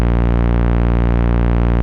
micromoog raw 5 doubling
A basic saw waveform from my Micromoog with 50% of one octave Doubling applied, very deep and smooth. Set the root note to A#2 -14 in your favorite sampler.